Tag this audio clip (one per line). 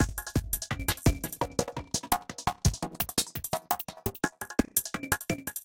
electro
hardcore
sliced